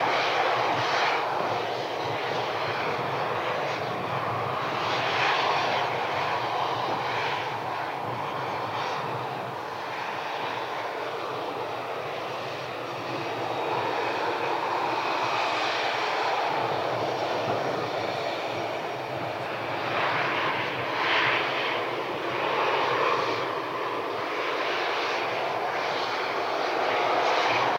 Plane Engine Loop
Recorded at Birmingham Airport on a very windy day.
Aircraft, Airport, Birmingham, Engine, Flight, Flyby, Flying, Jet, Landing, Plane